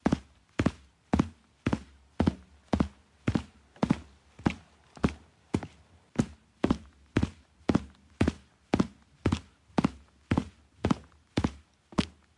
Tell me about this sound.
footsteps-wood-bridge-03-walking
footsteps, wood, field-recording, bridge